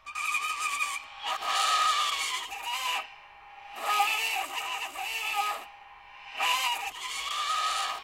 caged birds need H2o & light
recordings of a grand piano, undergoing abuse with dry ice on the strings
scratch, ice, screech, piano, torture, dry, abuse